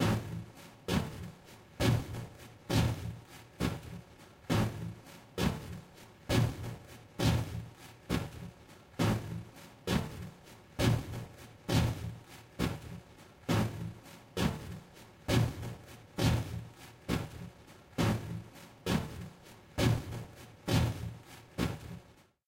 Short bursts of an artificial shovel, hitting what might appear to be hollow wood and gravel. This sound was generated by heavily processing various Pandora PX-5 effects when played through an Epiphone Les Paul Custom and recorded directly into an Audigy 2ZS.